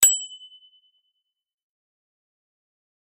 Bike, Bell Ding, Single, 01-01
Audio of a metallic bicycle bell being struck once and left to resonate.
An example of how you might credit is by putting this in the description/credits:
The sound was recorded using a "Zoom H6 (XY) recorder" on 13th February 2019.
bell, bicycle, bike, bikes, cyclist, ding, dinging, ring